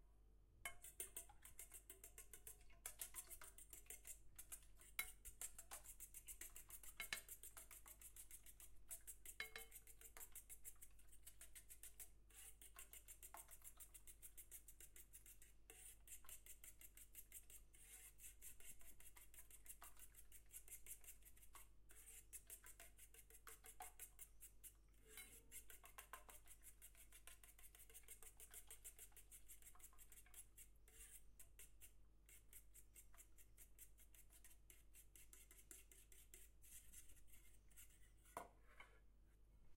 beating eggs in a iron container - binaural recording